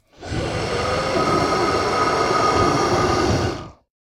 Monster wheezing 2
A monster wheezing.
Source material recorded with either a RØDE Nt-2A or AKG D5S.
beast, creepy, growl, haunted, horror, Monster, monsters, roar, scary, scream, sfx, spooky, terror, wail, wheezing